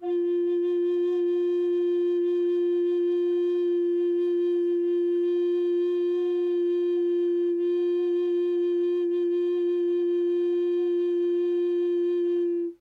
Alto Recorder F4
Quick sampling of a plastic alto recorder with vibrato. Enjoy!
Recorded with 2x Rhode NT-1A's in a dry space up close.